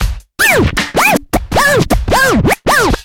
scratch206 looped
Scratched groove. Recorded in cAve studio, Plzen, 2007
you can support me by sending me some money:
chop, dj, loop, loopable, looped, record, scratch, scratching, stab, turntablism, vinyl